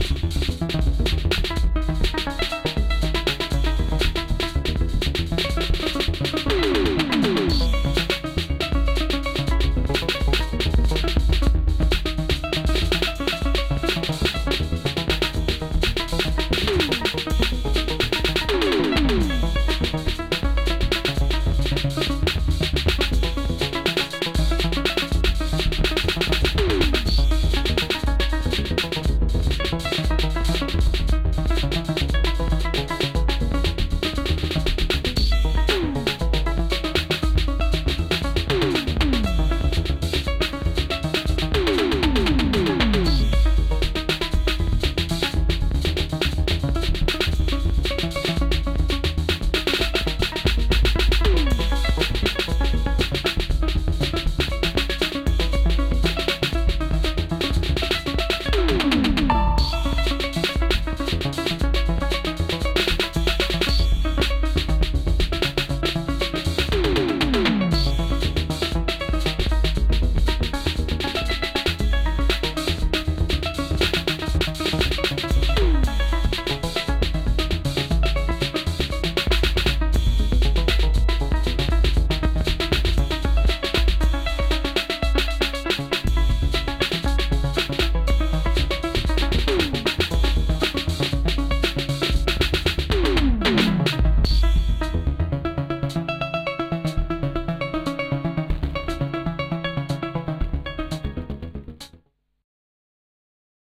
The never resting city...
Made with Roland V-drums and microKorg, recorded with WavePad